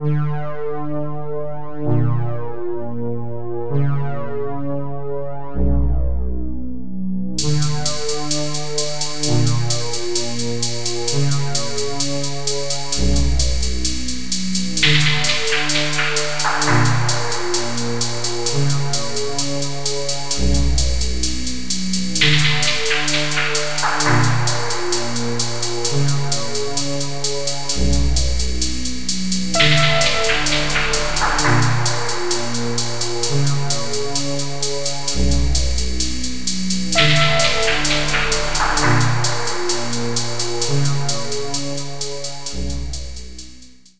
strange music for some type of background that is anxious or suspenseful and weird. Made in fruity loops
backdrop, background, music, soundscape, suspenseful, weird